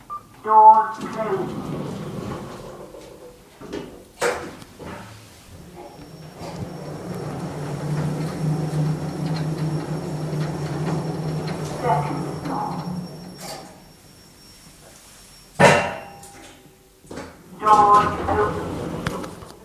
Lift5- up to second floor
I forget where this one started. Up to second floor in a lift. Includes me calling the lift, announcements and the lift in motion.
announcement, beep, call, closing, door, doors, elevator, kone, lift, motor, opening